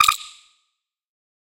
A snippet from my morning granular session, which I then cleaned and processed.